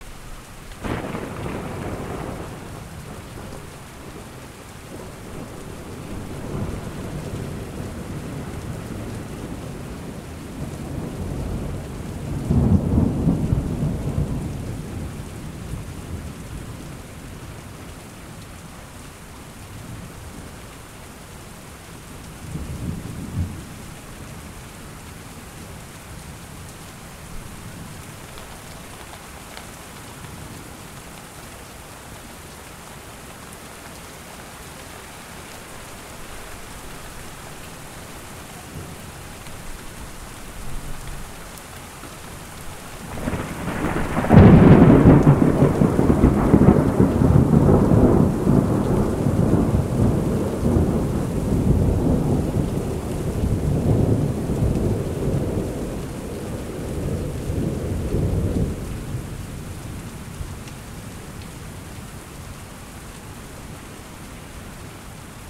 Rain Thunder From Window 01
Recorded with a Zoom H4n onboard microphones, spur of the moment kind of thing. No checking for an optimal recording position, or levels. I just switched it on, opened the window fully and started recording. The batteries were running on empty so I quickly caught as much as I could.